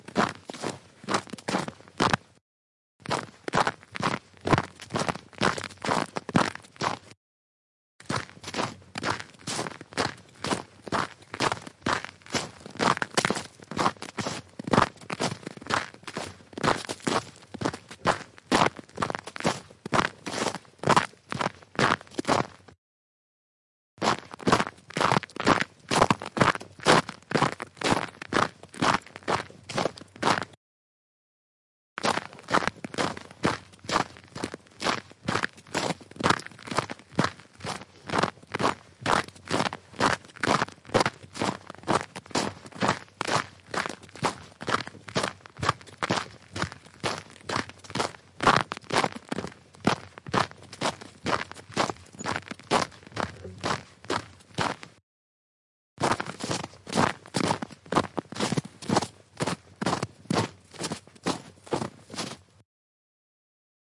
Diverse Jogging Snow
Jogging on a gravel path with little, fresh but cleared Snow, close mic.
Recorded on a Zoom H2 with internal Microphone, slightly Processed with EQ and Compression for closer feel, Compiled from Long Recording.
CloseUp, Crunch, Field-Recording, Footsteps, Snow, Walking